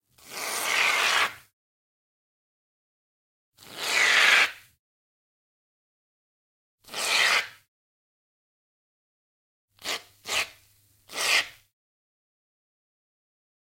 09 - Shaving foam
Spraying of shaving foam. (more versions)